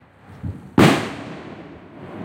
The sound of a distant shot being fired.